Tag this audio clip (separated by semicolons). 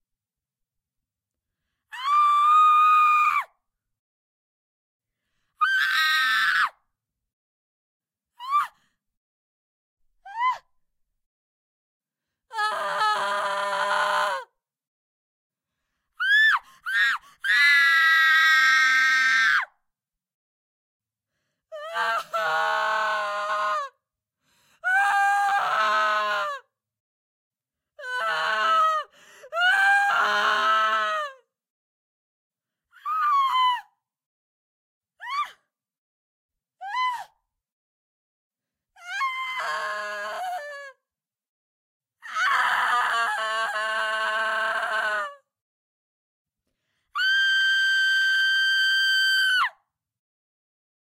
adpp; cry; fear; Female; grief; pain; panic; scream; shout; woman; yell